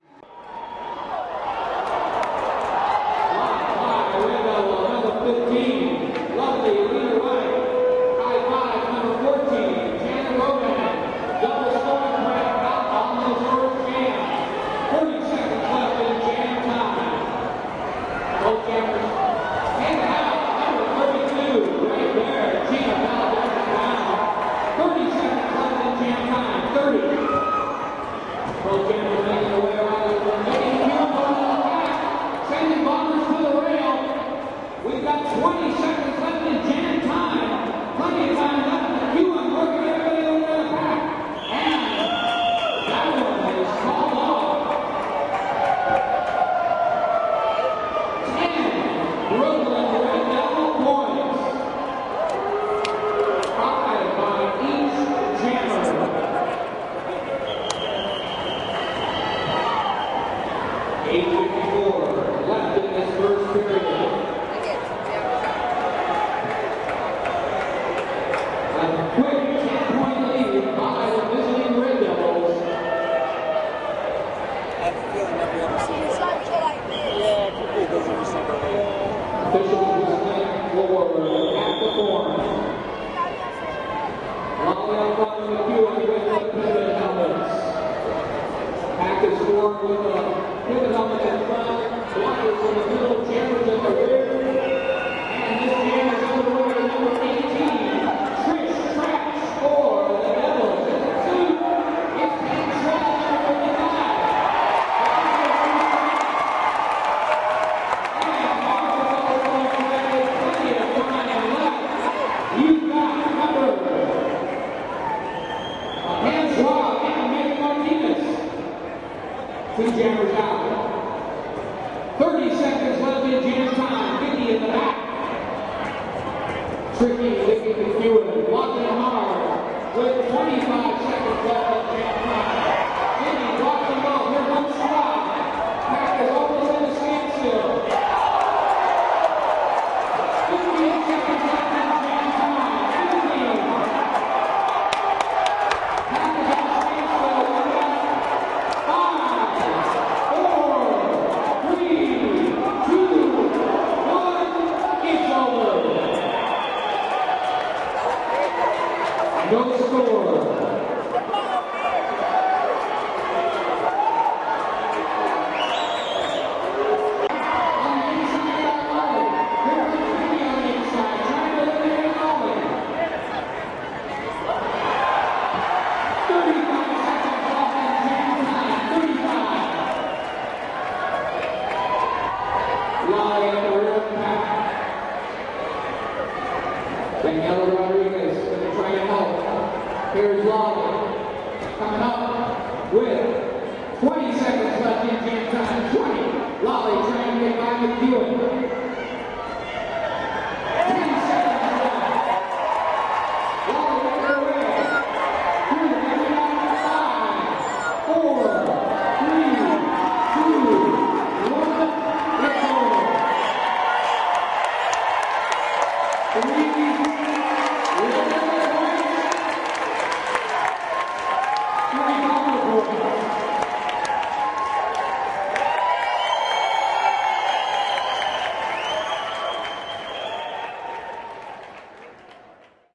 Sounds of Roller Derby with the San Francisco Bay Bombers, San Francisco, USA
mini-disc